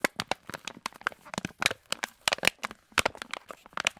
Crushing an empty plastic bottle